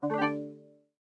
Vintage Alert Notification 3 1
Synthetized using a vintage Yamaha PSR-36 keyboard.
Processed in DAW with various effects and sound design techniques.
Alert, Bell, Design, Digital, Error, High, Keyboard, Long, Low, Minimal, Muffled, Notification, Off, On, Percussive, PSR36, Reward, Short, Sound, Synth, Synthethizer, Vintage, Yamaha